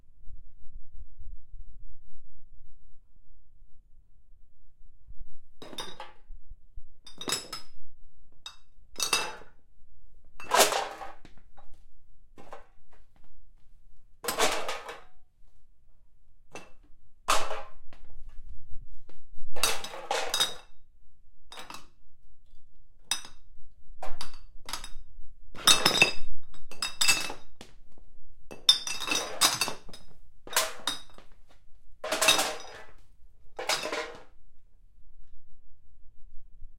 Kicking Pile of Bottles and Cans
Me kicking a pile of cans and bottles that was about to be taken out for recycling.